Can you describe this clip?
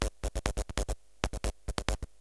inspired by ryoji ikeda, ive recorded the sounding of me touching with my fingers and licking the minijac of a cable connected to the line-in entry of my pc. basically different ffffffff, trrrrrrr, and glllllll with a minimal- noisy sound...
cable, electric, electronic, machine, noise, signal